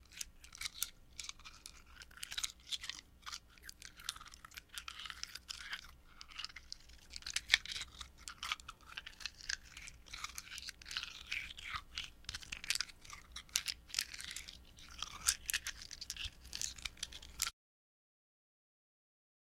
frotando piedras
frotando un puñado de piedras
Rubbing a handfull of rocks.
audio-technica, cali, diseo, estudio, frotar, icesi, interactivos, medios, rocas